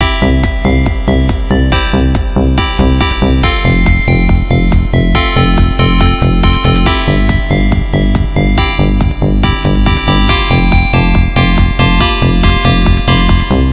A dance-ish loop at 140 bpm. Created by me in LMMS on 8/4/17. Contains bass, kicker, and bell samples. Upbeat and cheery.
140, bass, bell, bpm, drum, hat, hihat, loop, music, synth, techno